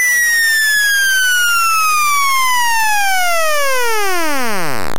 Sweep 2000-20Hz
20, hz, sweep